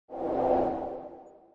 Short noise designed for game responsiveness.
noise, short, synth